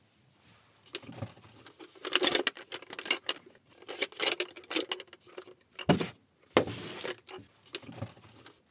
made by Natalia Belyaeva Sapere Aude -taking sword from ritter knight